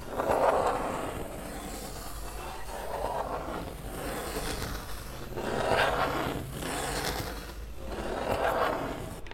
Slicing/Cutting through Wood.
Recorded using TASCAM DR-40 Linear PCM Recorder
cut, cutting, slice, slicing